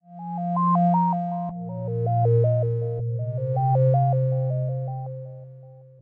Sine Melody
Simple Melody made with VSTi. cheers :)
delay, soft, melodic, 80, sinewave, bpm, synth